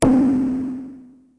sounds like eh.. like a tom drum but wobbly .. heh